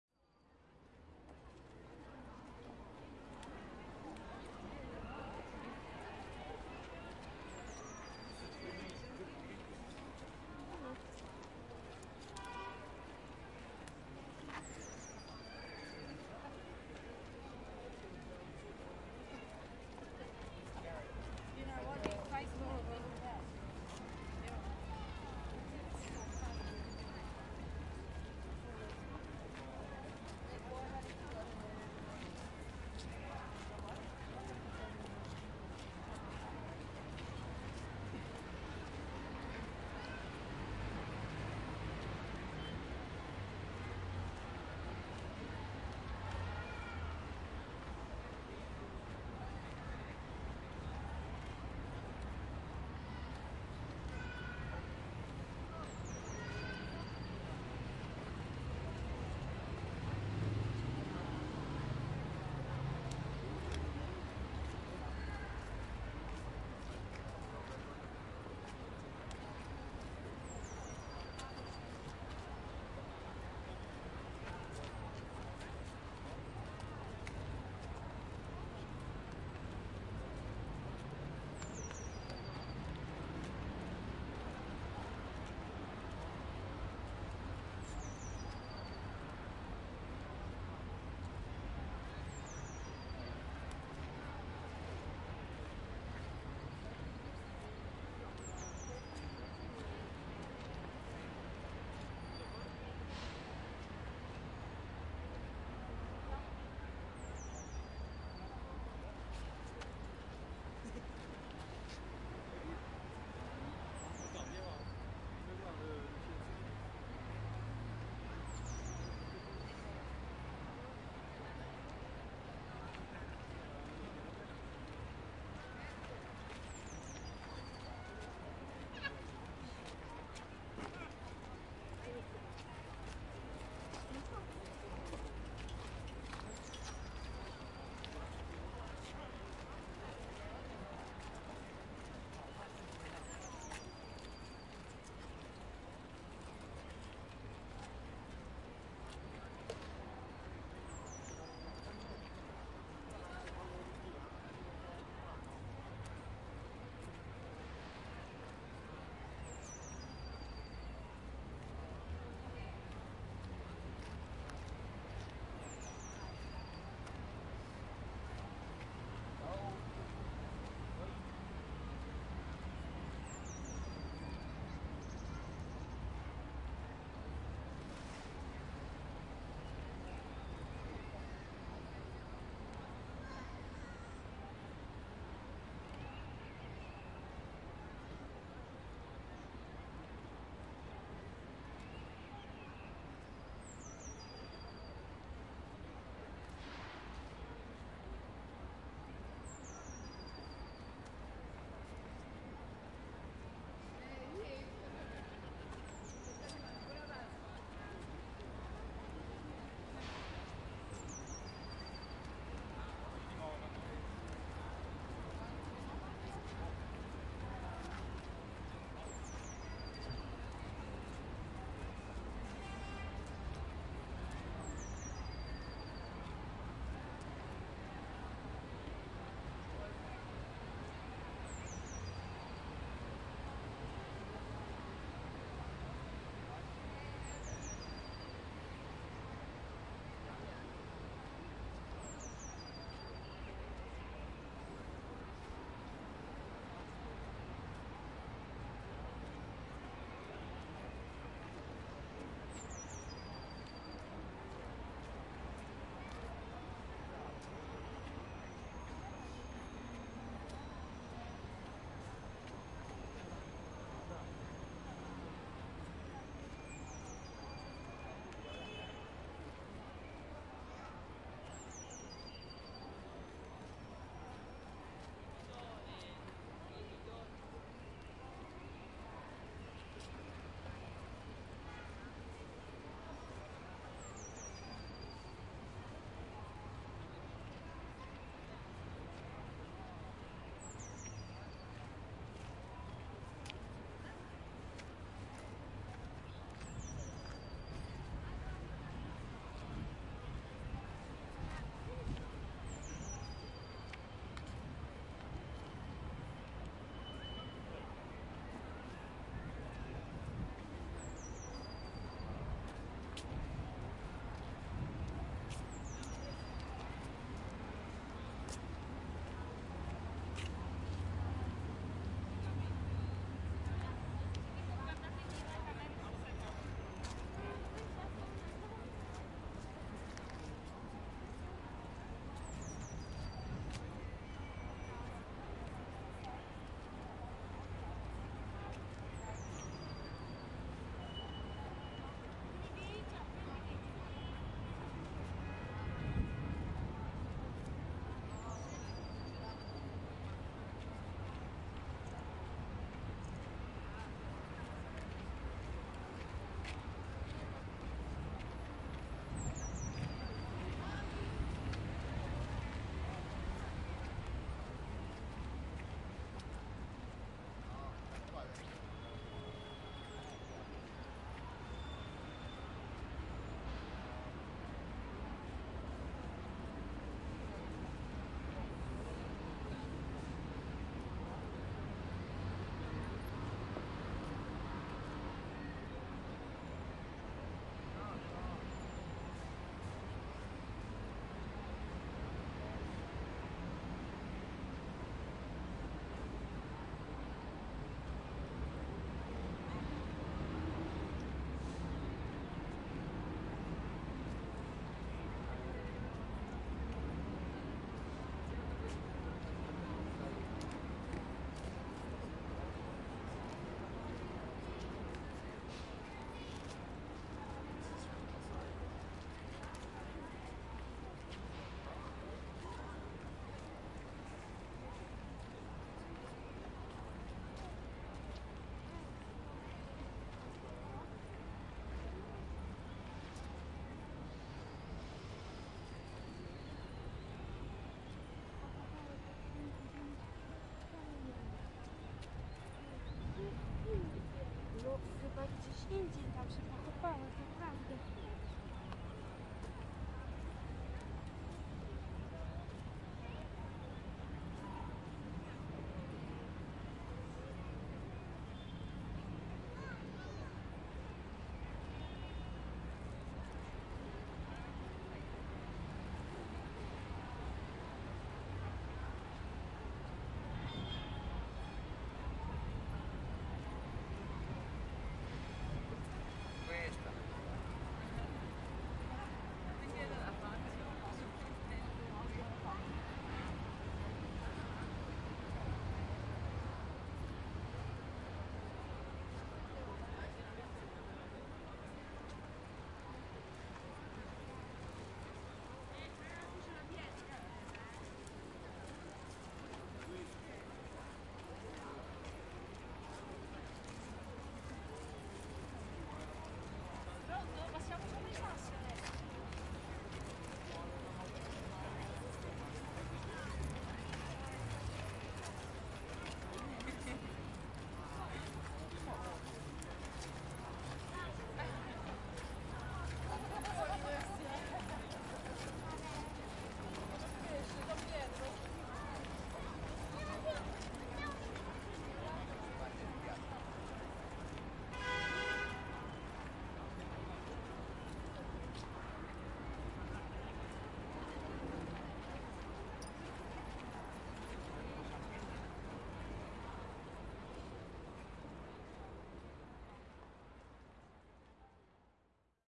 01 Rome, Colosseum Labicana, birds, crowd, steps, traffic, wind Selection
Roma, Colosseo Labicana, birds, crowd, steps, traffic, wind.
27/03/2016 12:30 pm
Tascam DR-40, XY convergent.
Colosseum, soundscape, street, crowd